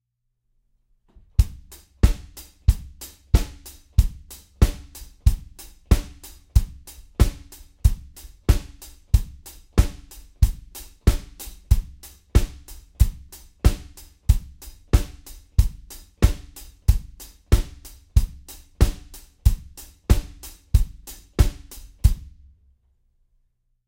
mLoops #4 93 BPM
A bunch of hip-hop drum loops mixed with compression and EQ. Good for Hip-Hop.
Compressed, BPM